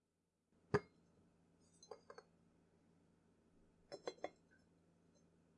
Tea pot set down
Tea pot being set down on ceramic saucer, lid being placed on.
clink, cup, glass, kettle, porcelain, tea-kettle, tea-pot, teapot